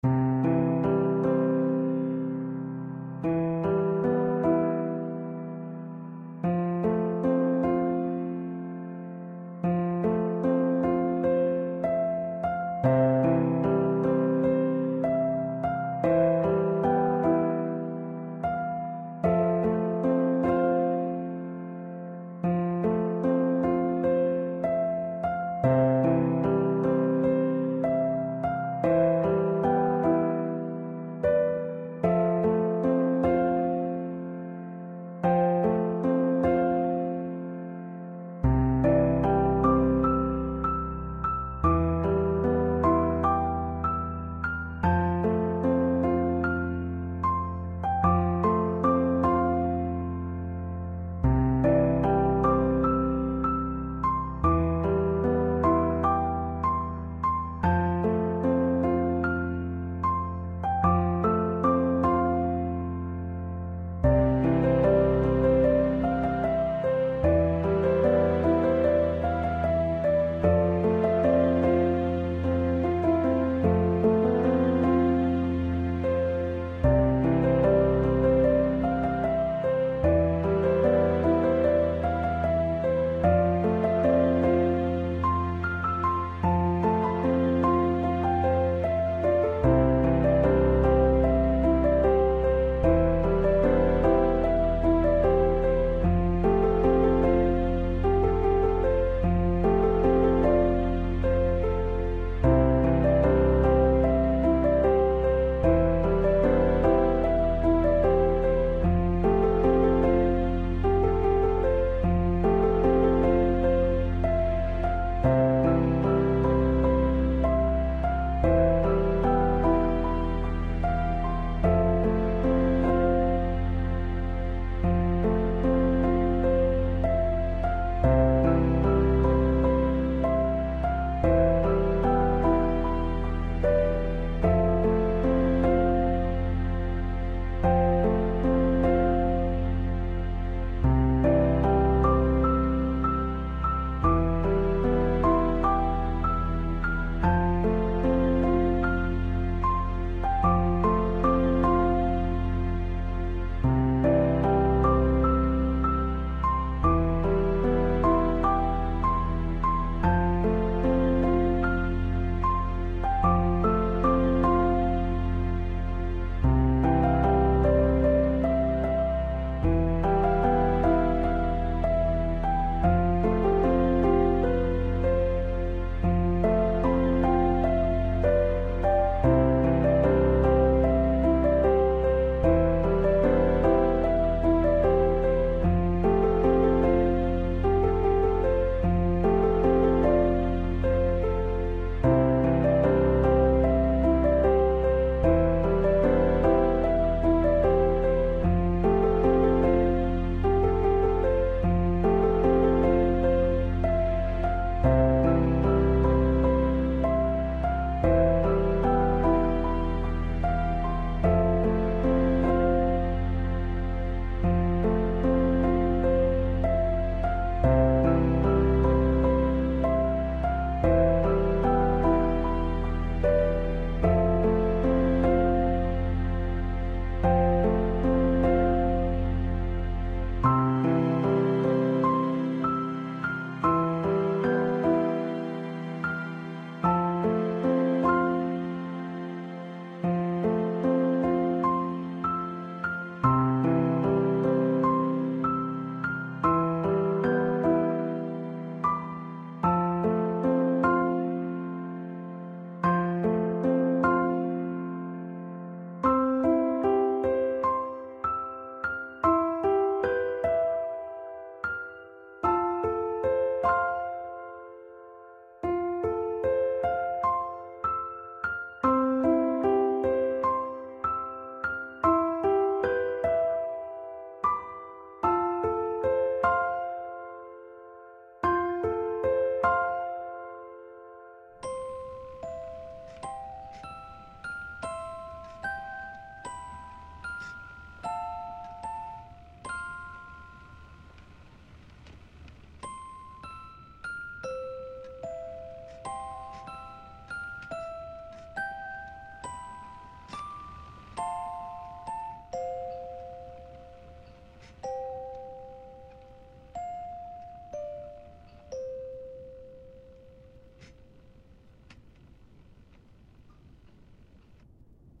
Genre: Piano, Emotional
This one is unfinished, and this supposed to be on full orchestra, well I have a feeling to get this rejected so not gonna finish this one.